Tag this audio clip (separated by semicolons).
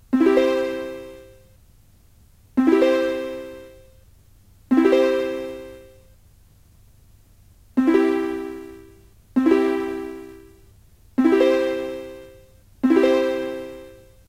chord; harp; synth